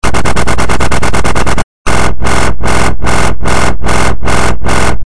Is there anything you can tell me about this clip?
basy stepy3
brutal bass from FL